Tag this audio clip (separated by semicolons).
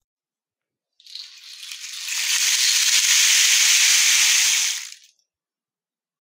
device; format; handheld; Indoor-recording; instrument; LG; rainstick; recording; smartphone